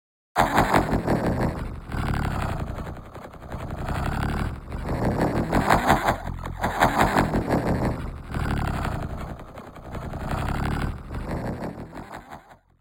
Evil Laught 01 HR
Made this sound with a HCM Synth called Tone2 Gladiator.
hell, hcm, awesome, laught, tone2, dark, synthesis, evil, gladiator, haha, laughter